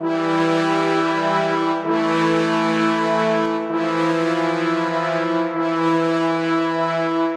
Brass hit/licks melody